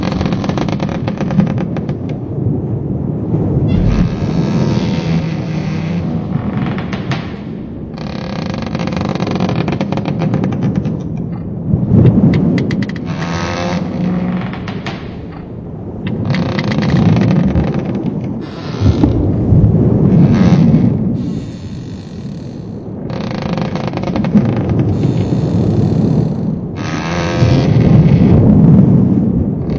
creaking sailing boat
Imagine your on the high sea's,adrift on a deserted sailing ship, lost, dieing of thirst,or your on a sea adventure to the to new world,onboard the Mayflower, or on your way to Australia, a slave ship. out frightening that would be. This is 30 second sample that you could loop, made for one of my soundscape projects some time ago. the creaking was recorded by means of dry door hinges. have fun
PLEASE MARK THE STARS IF YOU LIKE
adrift, boat, brigantine, celeste, deserted, discovered, field-recording, harbour, high, marina, marine, mary, mast, merchant, pirates, rig, rigging, rope, sail, sailing, sails, sea, ship, wind, yacht